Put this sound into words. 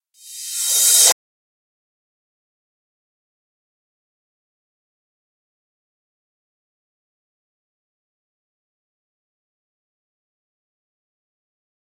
Rev Cymb 13
Reverse Cymbal
Digital Zero
cymbal, reverse